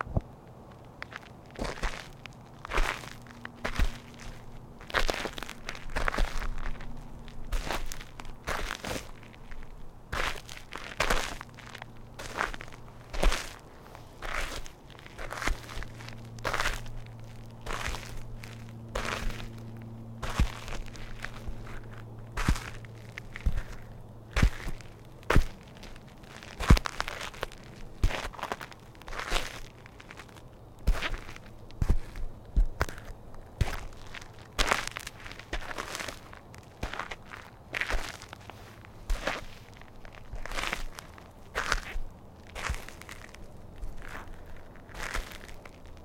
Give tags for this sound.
Foot walking gravel

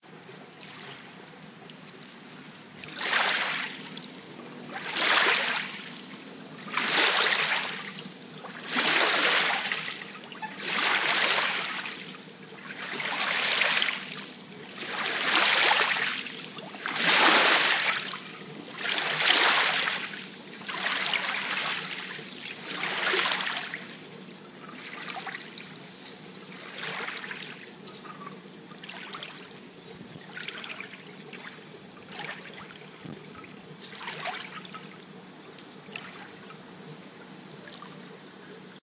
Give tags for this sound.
beach Loud waves seaside lapping coast shore ocean sea

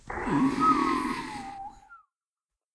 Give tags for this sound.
raptor dino door horror doom scifi

